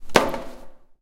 snd ImpactNormalWood05
metal impact of a wheelchair with wood, recorded with a TASCAM DR100
wood, impact, wheelchair